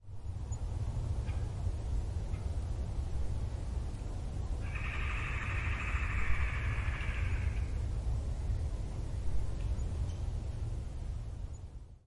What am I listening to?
PileatedWoodPeckerLakeMboroMarch8th2014
The haunting call of the Pileated Woodpecker, the largest woodpecker in the United States. It's quite an experience to hear this loud, rattling call break the stillness of the peaceful woods. You can also tell these beautiful birds around by looking for fairly large, RECTANGULAR holes in the trees.
Recorded with my Zoom H4N recorder and using the internal, built-in microphones.
The location was the Shawnee National Forest.
birds, pileatus, woodpecker, nature, forest, drumming, field-recording, dryocopus